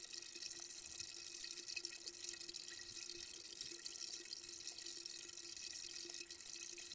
Soda Bubble Loop
Soda in a Bottle
a, Bottle, bubble, effer, fizz, Loop, out, Soda, Sprudeln, vescence, vescency, vescepour